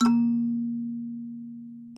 Totally awesome Kalimba, recorded close range with the xy on a Sony D50. Tuning is something strange, but sounds pretty great.